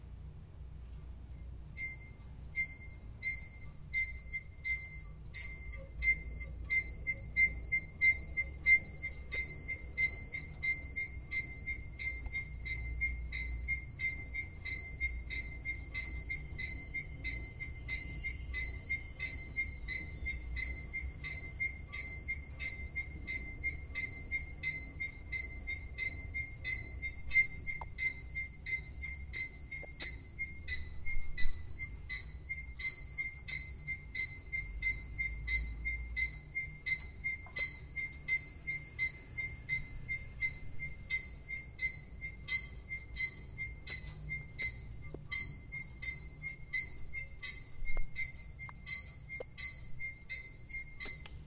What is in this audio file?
Sonido de robot viejo y destartalado andando.
Solo para carcaza, no motor.
24-48